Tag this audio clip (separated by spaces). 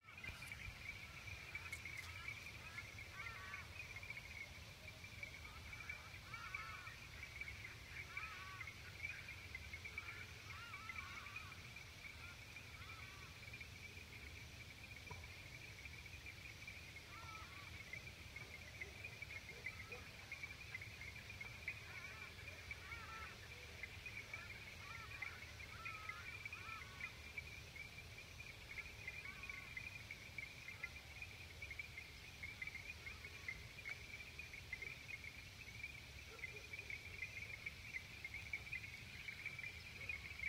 Night Environment Birds Dusk Atmosphere Ambience Wilderness Country Wetland Ambient Frogs